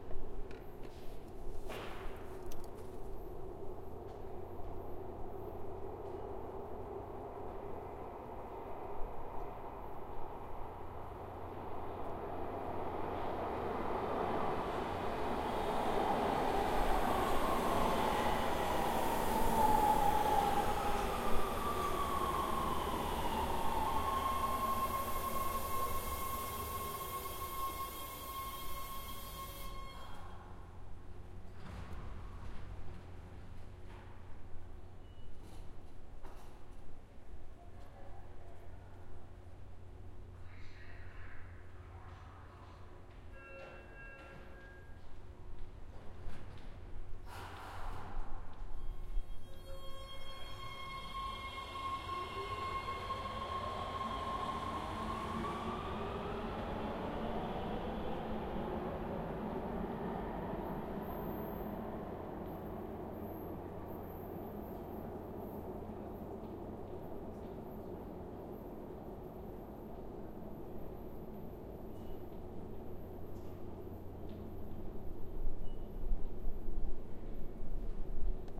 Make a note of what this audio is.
Subwayarriving&leavingtopfloor2
Field Recording of a subway arriving and leaving, above perspective
Subway, zoom